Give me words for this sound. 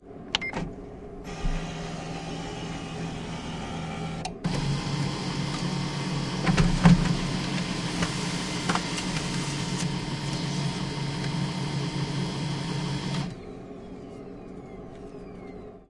Office fax-printer scan and print one page. HP LaserJet 1536dnf MFP. Loud background noise is ventilation.